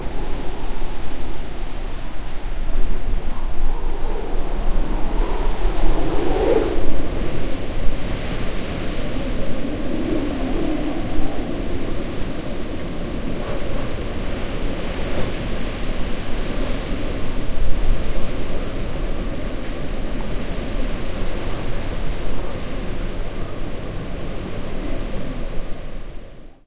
strong wind1
blow, weather, gale, windstorm, wind, blowing